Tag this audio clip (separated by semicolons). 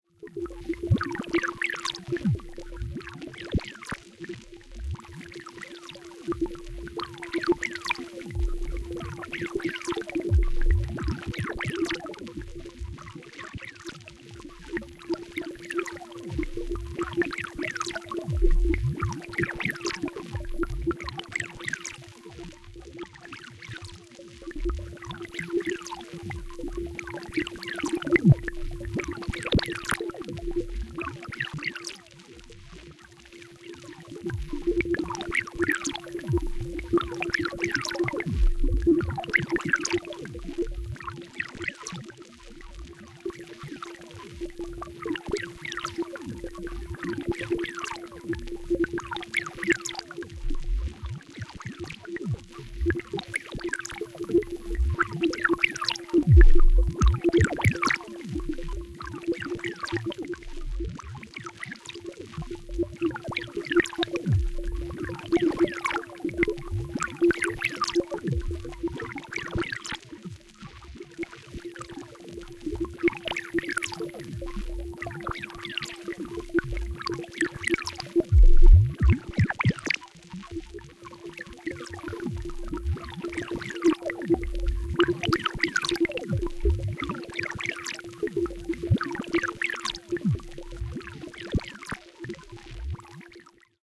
effect; digital; efx; water; ambient; spectral; fx; sphere; noise